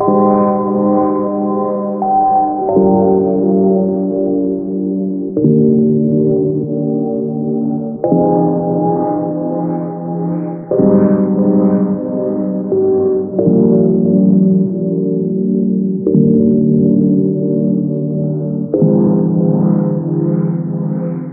Some Loops (Pitched 01)
hop, piano, nice, funky, melodic, emotional, keys, trap, loops, untuned, minor, hip, lofi